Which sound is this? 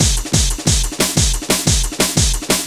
Roller Derby
Not your typical breaks.
amen, breakcore, breaks, harsh, rough